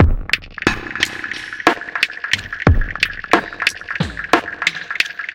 abstract-electrofunkbreakbeats 090bpm-remixdafunk
this pack contain some electrofunk breakbeats sequenced with various drum machines, further processing in editor, tempo (labeled with the file-name) range from 70 to 178 bpm, (acidized wave files)
abstract, beat, chill, club, dj, dontempo, downbeat, drum-machine, heavy, reverb, rhytyhm